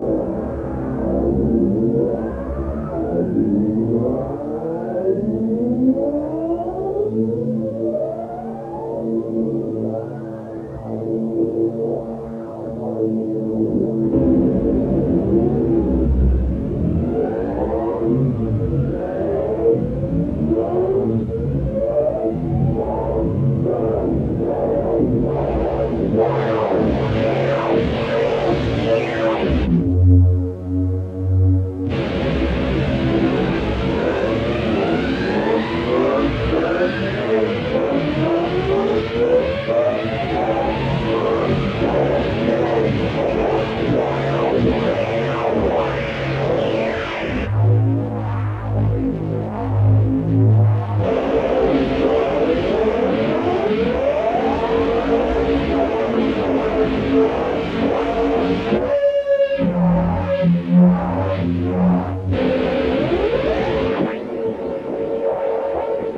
A siren noise. From the creator of "Gears Of Destruction" enjoy these sounds.